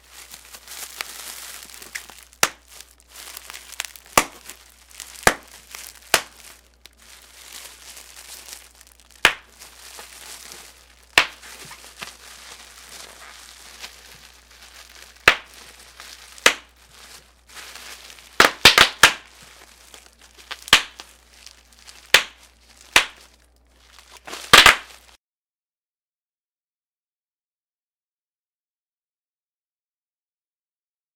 Bubblewrap pop plastic
bubble, Bubblewrap, plastic, pop, popping, wrap